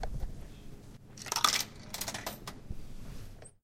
It is a sound of someone introducing a coin on a coffee machine. It has been recorded with the Zoom Handy Recorder H2 in the hall of the Tallers building in the Pompeu Fabra University, Barcelona. Edited with Audacity by adding a fade-in and a fade-out.